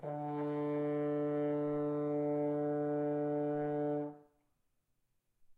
horn tone D3
A sustained D3 played at a medium volume on the horn. May be useful to build background chords. Recorded with a Zoom h4n placed about a metre behind the bell.
d, d3, french-horn, horn, note, tone